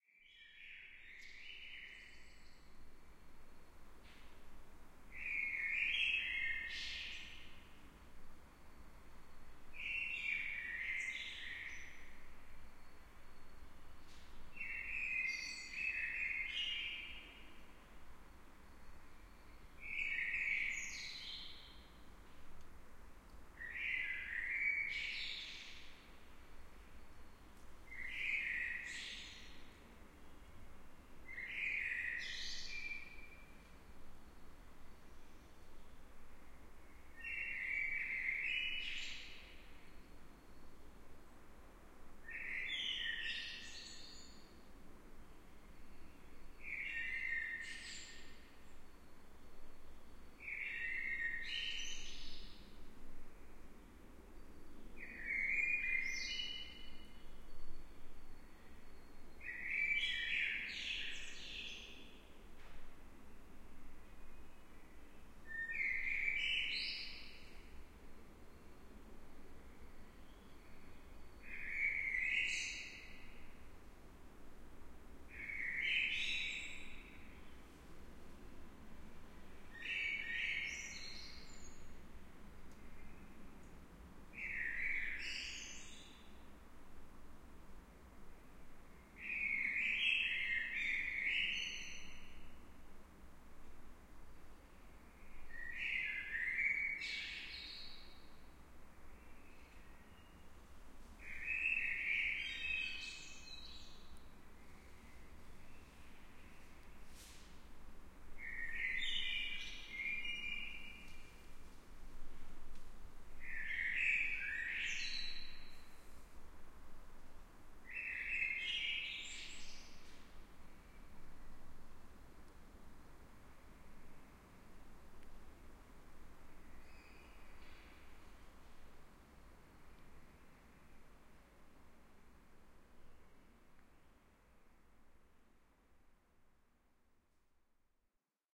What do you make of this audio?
BACKYARD BLACKBIRD DAWN
A single Blackbird singing at early dawn in the enclosed backyard of a 5 story house in Berlin.
blackbird, dawn, backyard, bird, birdsong